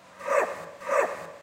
Animal Call 1
Recorded using a zoom h2n recorder. Dog barking. Edited in audacity.
animal, dog, bark